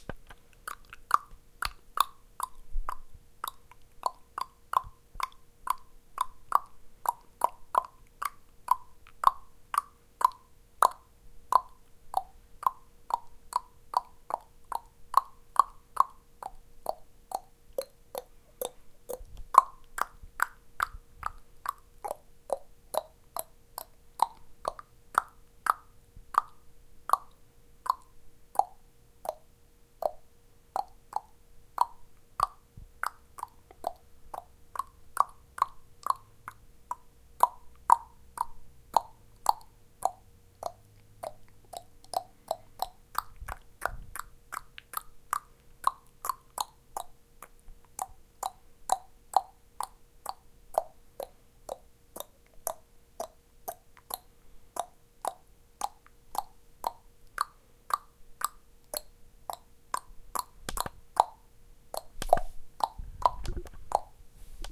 Using my tongue to create clicking sounds in my mouth. Recorded using Zoom H4N.